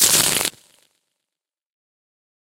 Quick Shuffle Sweep
Really quick card shuffle recorded into Logic Pro 9 with an SM58. Processed in Logic Pro 9 using some stereo imaging, compression, and delay.
design, broadcasting, effect